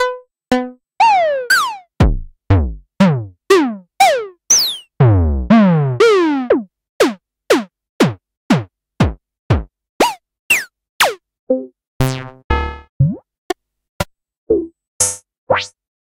MOOG 32 ANALOG PERC SAMPLE CHAIN x32
SAMPLE CHAIN for octatrack
analog, chain, drum, drums, octatrack, percussion, sample